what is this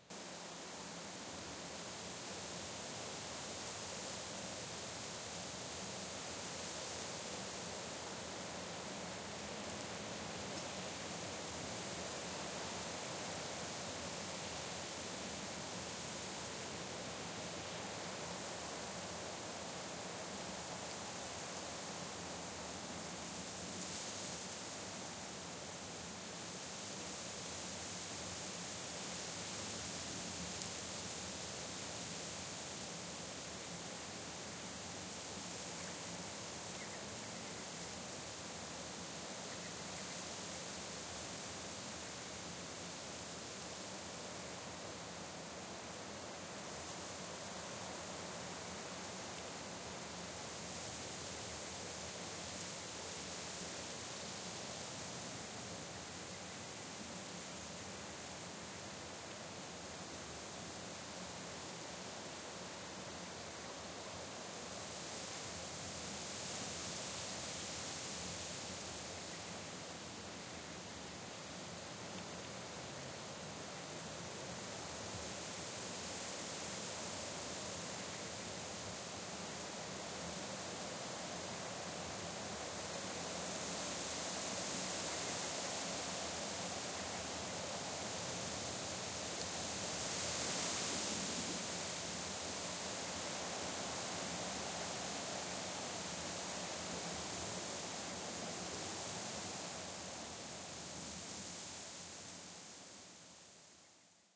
WIND, Wind through Wheatfield
wind rushing through a wheatfield, with soft crackling noises and a few birds in the BG
crackling, field, rushing, wheat, wind